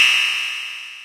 From a collection of percussive patches programmed on the Terratec Komplexer wavetable softsynth, basically a Waldorf Micro-q VST-adaptation.
hihat, hit, komplexer, metallic, microq, open, single, softsynth, terratec, waldorf